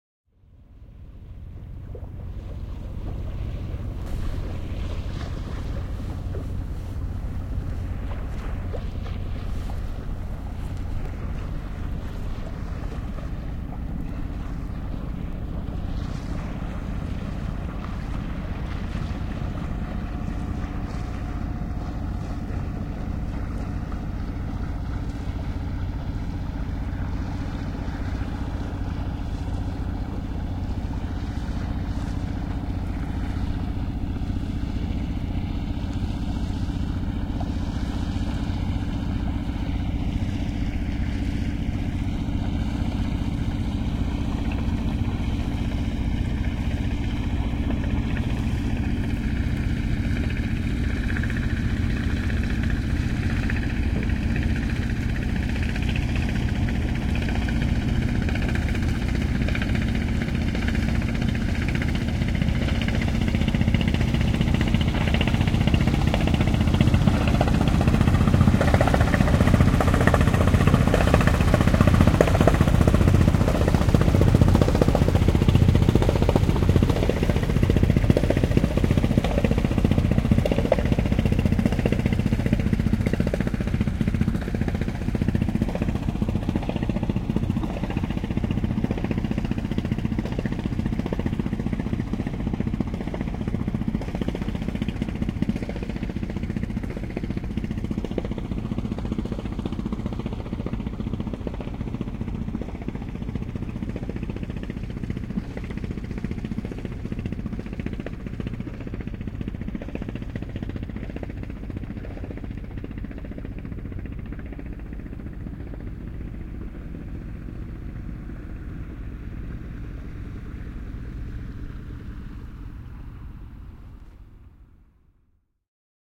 Kalastusvene, ohi / Fishing boat passing, wooden, 1-cylinder Yanmar diesel marine engine, water sounds
Puinen vene lähestyy, ohiajo läheltä, etääntyy, putputus. Vesiääniä. (Meridiesel Yanmar, 1-sylinterinen, 14 hv).
Paikka/Place: Suomi / Finland / Kirkkonummi, Upinniemi
Aika/Date: 19.08.1997
Finnish-Broadcasting-Company, Kalastus, Vesiliikenne, Vene, Veneily, Boat, Field-Recording, Tehosteet, Yleisradio, Fishing, Boating, Finland, Suomi, Yle, Soundfx